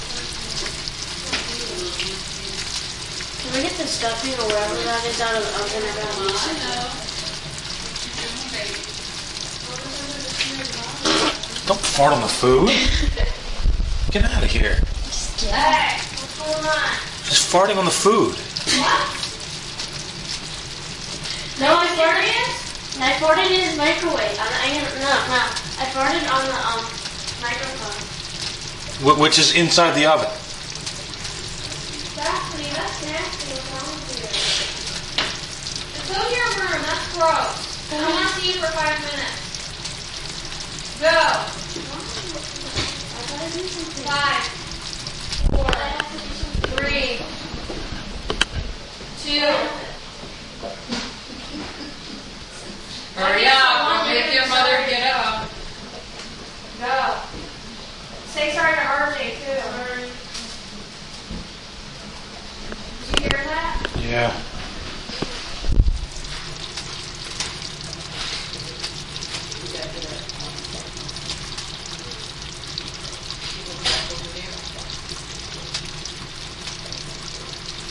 Close oven perspective of turkey sissling in it's goodness when someone breaks wind at the bird recorded with DS-40. 10 years from now this person will appreciate this recording's significance. Hello there.